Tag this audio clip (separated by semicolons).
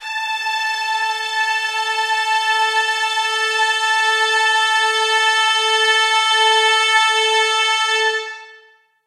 Instrument; Orchestra; Spook